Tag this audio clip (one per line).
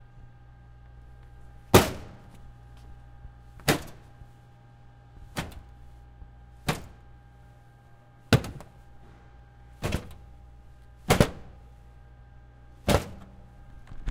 hit
plastic